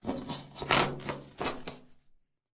delphis EMPTY TUBE LOOP #094
EMPTY DIFFERENT TUBES WITH SOAP SHAMPOO OR JELLY